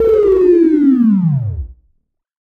Attack Zound-09
Similar to "Attack Zound-02" but with a longer decay. This sound was created using the Waldorf Attack VSTi within Cubase SX.
soundeffect, electronic